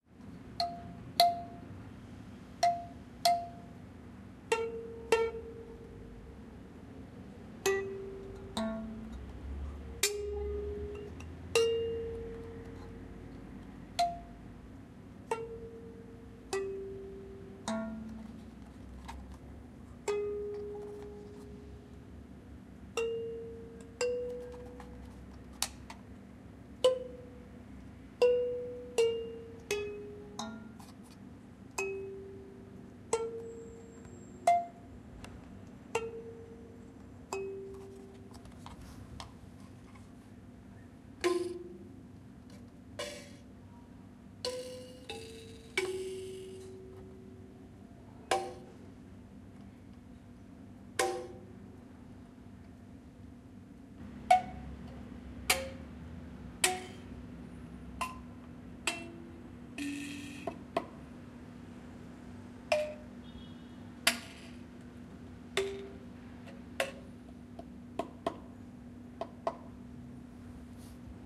Tokyo - Thumb Piano
Plucking and tapping a thumb piano in a Tokyo drum museum in May 2008. Recorded on a Zoom H4, light eq and compression added in Ableton. It's pretty rough, lots of room noise but wanted to post it cos is it useable. If you tune this down an octave and stick it through a gtr amp, you can get some cool double bass/piano type sounds going, and some atmospherics from the squeaks and clunks. Here's one I made earlier.